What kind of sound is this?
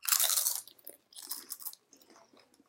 potato chips2
Crunching potato chips
chips, crunch, crunching, masticar, morder, papas, potato, transition